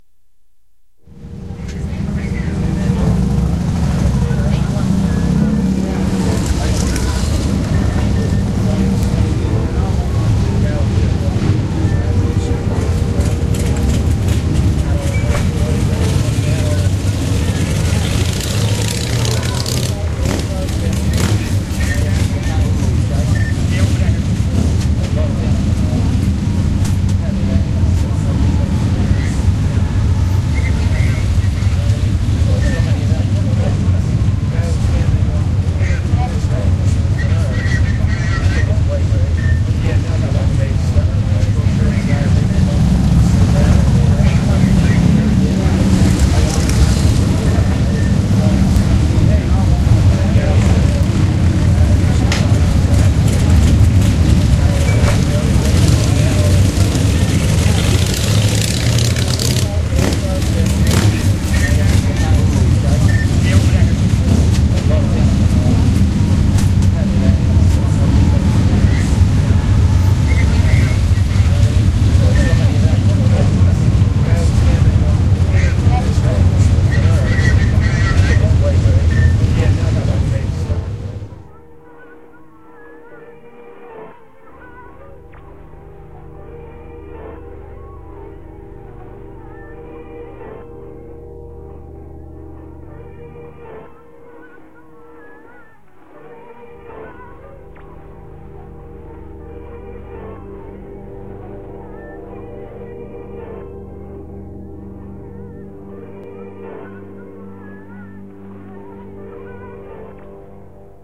Gettysburg Soundtrack 2008
Special day in Gettysburg PA, sitting at the PUB & Restaurant watching all the cyclists and RV's go by.